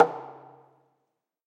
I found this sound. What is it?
Recordings of different percussive sounds from abandoned small wave power plant. Tascam DR-100.
ambient, drum, field-recording, fx, hit, industrial, metal, percussion